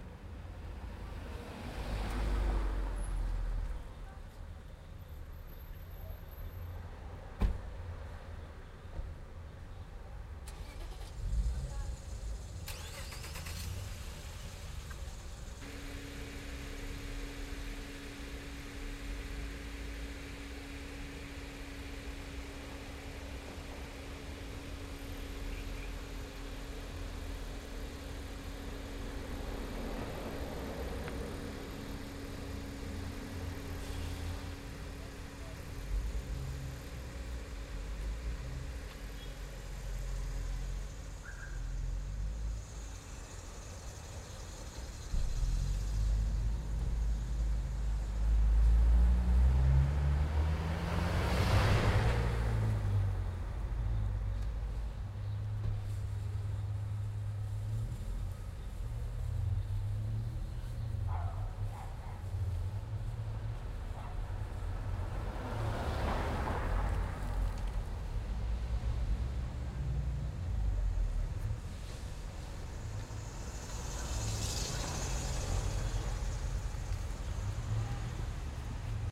Sound of the street and cars passing by.
Recorded with Zoom H1